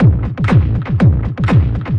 Gritty Drums | Loop
A really gritty and lo-fi drumbeat, which sounds like it comes from some ancient tape recorder.
This is the looping section, that goes on as long as you need it to.
You can find a tapestyle startup, and wind-down, to add a little bit of extra grittiness to your track, in the 'Gritty Drums' soundpack.
120 BPM | Original by old_waveplay.
Have fun, see you on the other side!
My favorite number is 581556 and ¾.
120-bpm, beat, distorted, drum, drums, gritty, rhythm, rubbish, vintage, wind-down